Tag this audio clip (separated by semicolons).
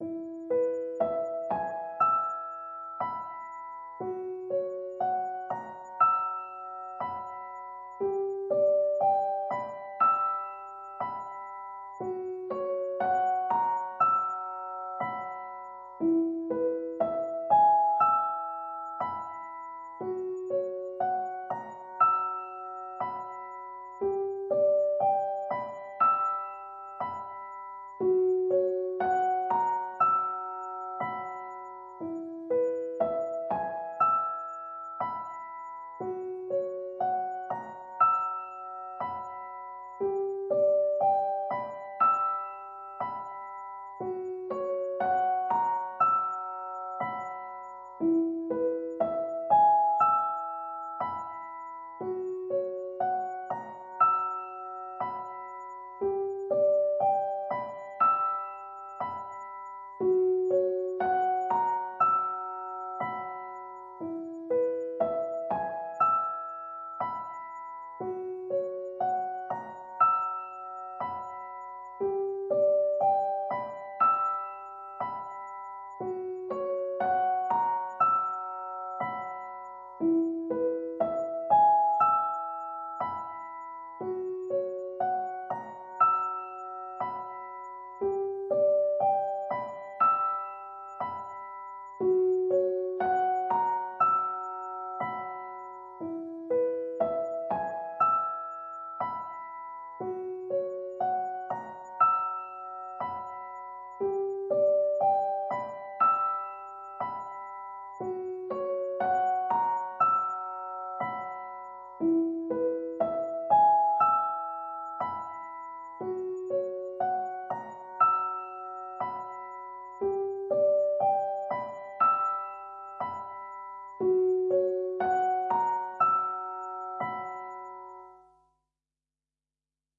120; bpm; free; loop; samples; simple; simplesamples